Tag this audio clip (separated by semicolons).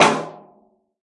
snare; MobileRecord; Livedrums